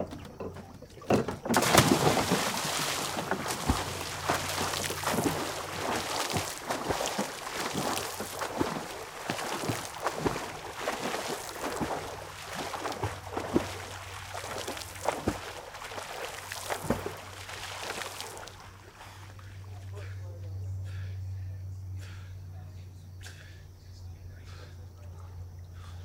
Cannonball off dock, splashing, swimming
Cannonball-style jump off of a wooden dock into water, followed by swimming